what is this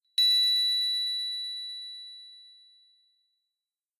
Crystal Twinkle
Could be used for some sort of treasure, gemstone, stars, etc.
bell, bells, chime, chimes, fairy, glisten, glitter, sparkle, spell, twinkle